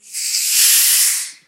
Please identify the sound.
Steam/hiss
Air pressure being released: a hissing sound or steam release.
Using something I created?
hiss, steam, steamengine